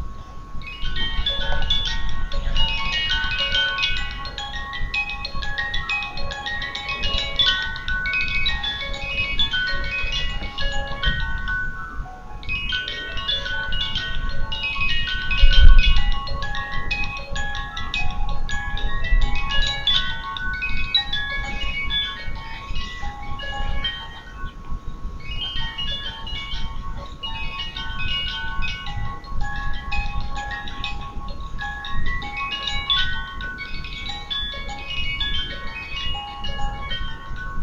field recording from automaton theater, dolly playing